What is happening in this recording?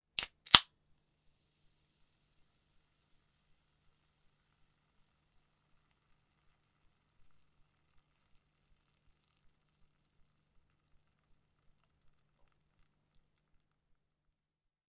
Fizzy Drink Can, Opening, D
Raw audio of a 330ml Pepsi can being opened. The initial fizz after opening is also present.
An example of how you might credit is by putting this in the description/credits:
The sound was recorded using a "H1 Zoom recorder" on 16th April 2017.
can, carbonated, coke, cola, drink, fizz, fizzy, open, opening, pepsi, soda